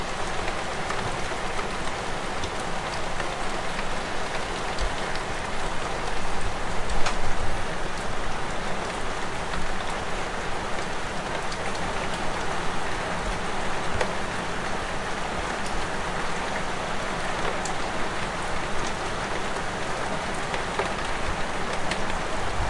Short clip of heavy rain.
Recorded with a rode NT-5 placed outside window pointing down towards gazebo roof.
No processing on sample
England, Gazebo, Outside, Rain, Raindrops, Rode-NT5, Weather